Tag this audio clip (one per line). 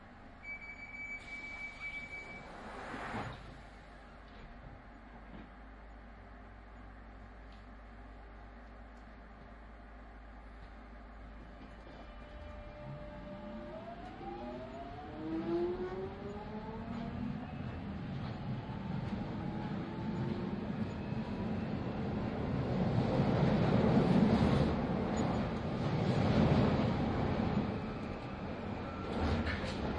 ambiance
ambience
binaural
c4dm
field-recording
london
qmul
tube